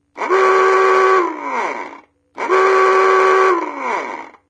Diving alarm from USS Woodrow Wilson, Ckt. GD. Made by Federal Sign and Signal.
diving, alarm, submarine